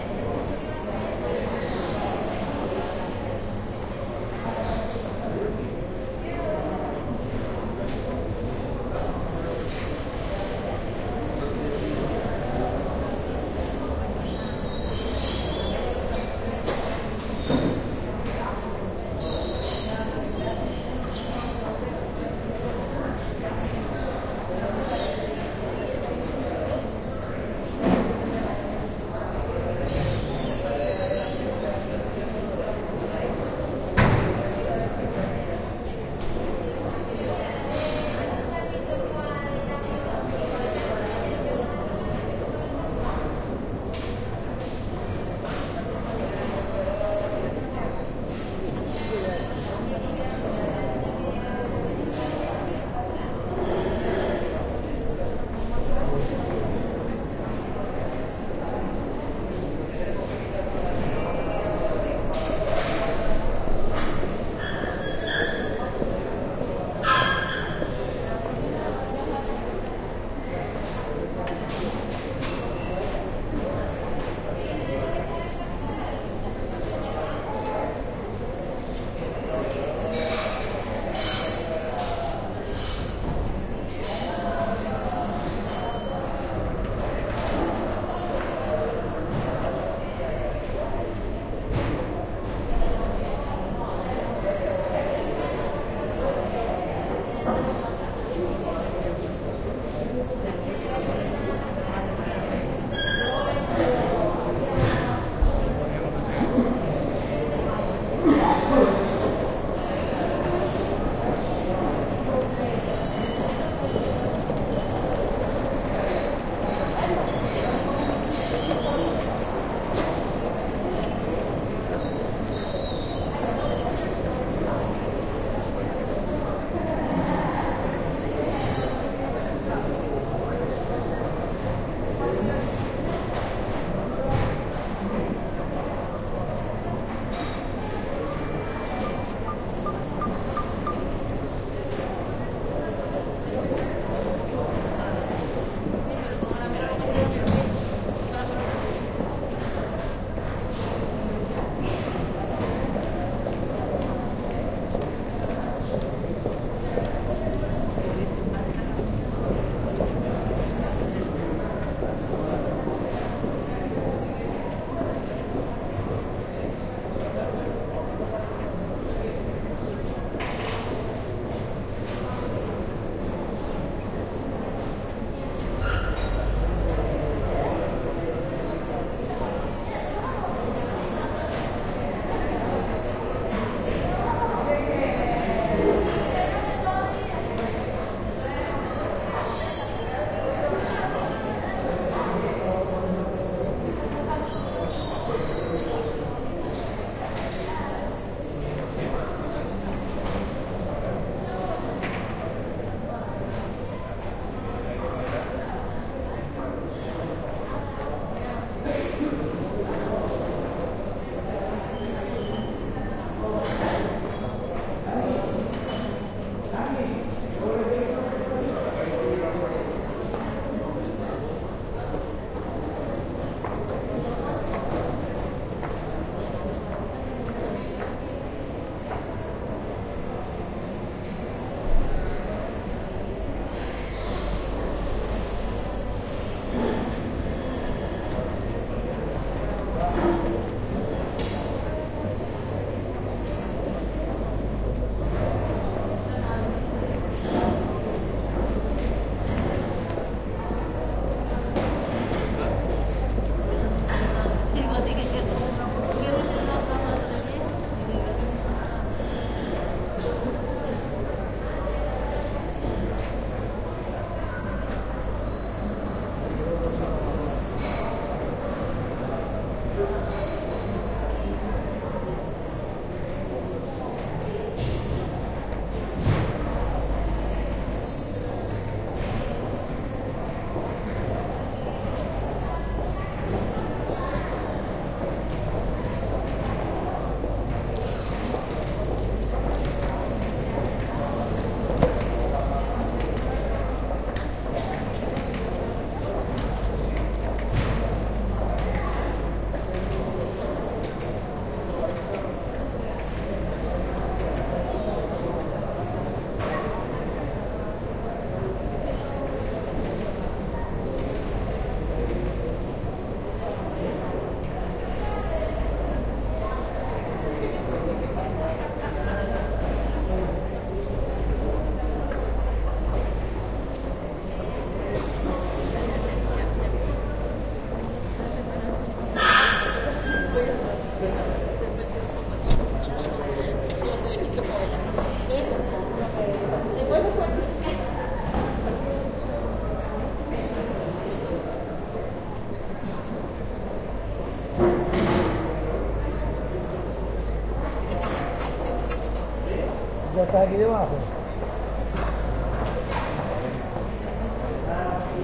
Ambience of the arrivals area in an airport. Recorded with the Marantz PMD 661 MKII internal stereo mics.
ambient, people, soundscape, airport, terminal, general-noise, field-recording, ambience, aeropuerto, ambiance, atmosphere, arrivals